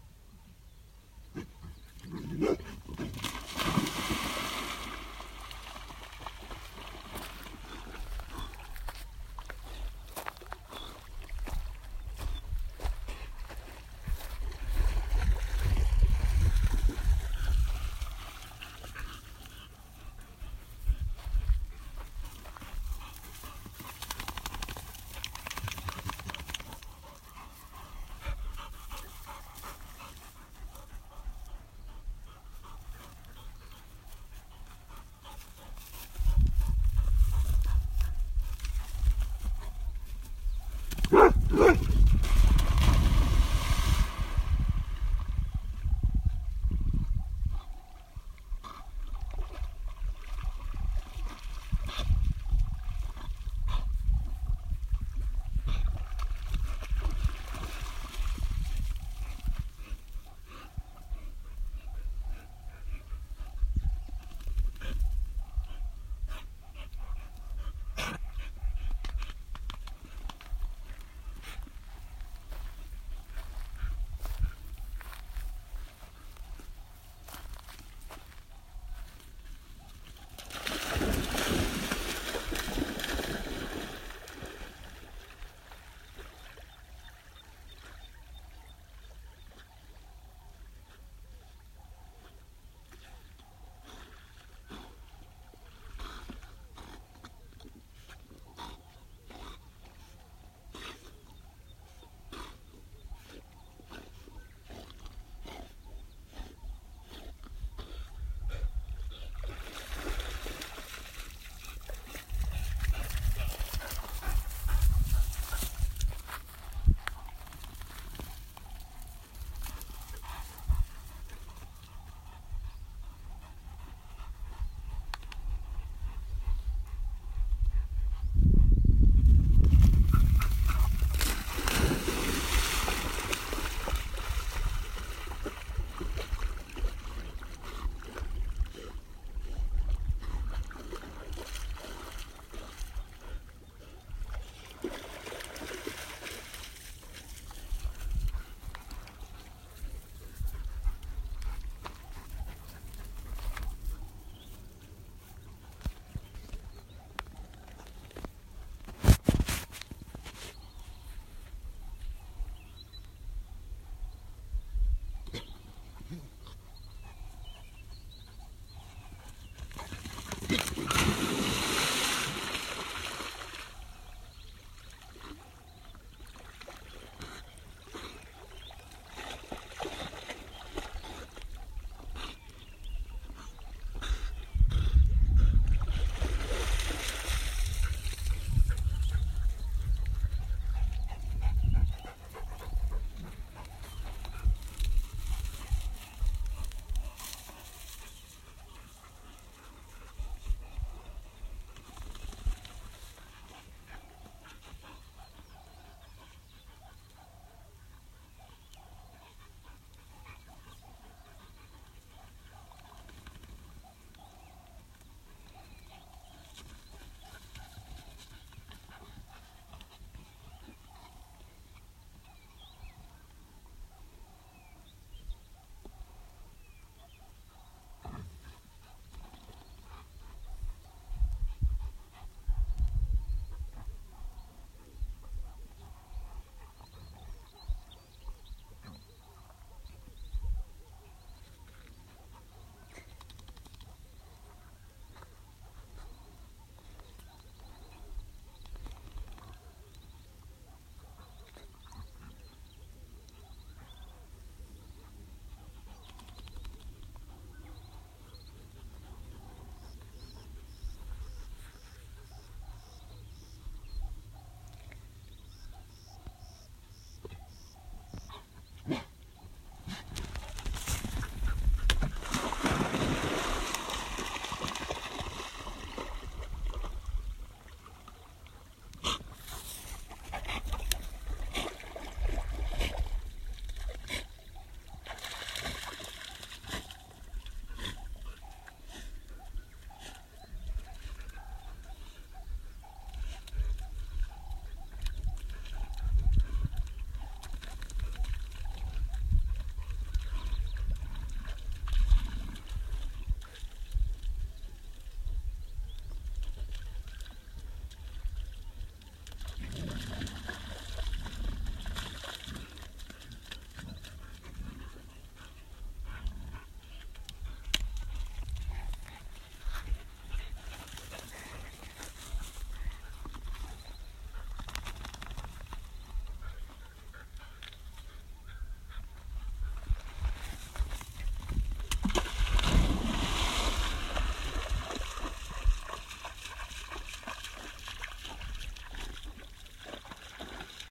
Dogs barking, splashing, panting
Some dogs play in a lake. Barking, jumping in to collect sticks, splashing, shaking and panting. Some birds and unfortunately some wind. The loud dog bark is a Rhodesian Ridgeback - Great Dane cross.
barking, dogs, panting, splashing